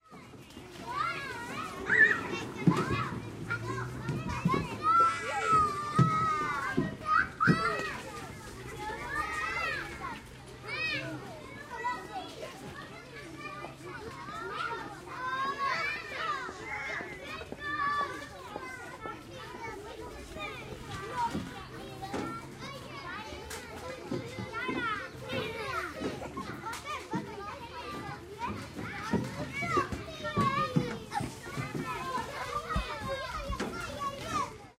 KINDERGARTEN TRAFFIC FOOTSTEPS
A kindergarten playground in Jerusalem , Israel , with some traffic and footsteps in BG . Recorded with AT822 mic , FR2LE recorder , and edited with Protools LE .
children, field-recording, hebrew, israel, kindergarten, playground